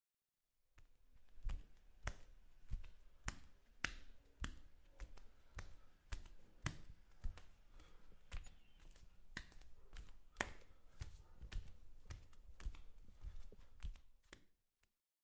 15 Barefoot walk
CZ, Czech, Panska, walk, walking